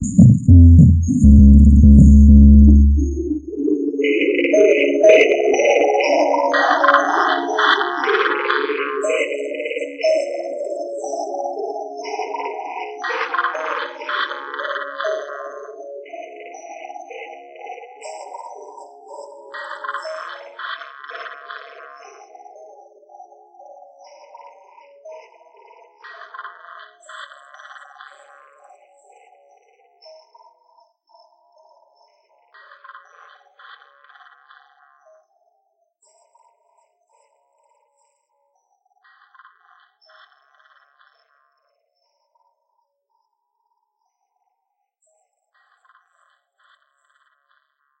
DISTOPIA LOOPZ 010 100 BPM
DISTOPIA LOOPZ PACK 01 is a loop pack. the tempo can be found in the name of the sample (80, 100 or 120) . Each sample was created using the microtonic VST drum synth with added effects: an amp simulator (included with Cubase 5) and Spectral Delay (from Native Instruments). Each loop has a long spectral delay tail and has quite some distortion. The length is an exact amount of measures, so the loops can be split in a simple way, e.g. by dividing them in 2 or 4 equal parts.
distortion, loop